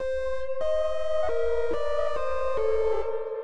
Pad riff 3
3, pad, riff